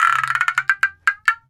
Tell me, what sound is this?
A kind of cuíca with a wire.
Available also from
Hearing is seeing
cuica, frog-like, percussion, stretch, tight